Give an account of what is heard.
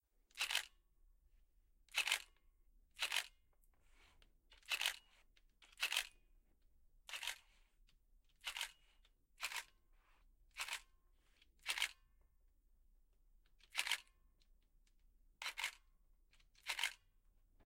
camera click dslr
The classic "click" of a dslr camera.